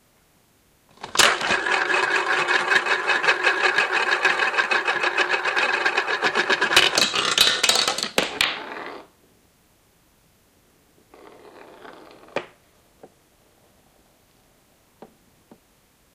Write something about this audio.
MOUSE TRAP BOARD GAME
The sound of a marble set off in a game of Mouse Trap, missing its intended target on the board and spilling onto a wooden table.
Board-game, Marble, Table